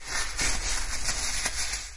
This sound was recorded in the bathroom of Campus poblenou.
We can percieve the sound of creasing paper.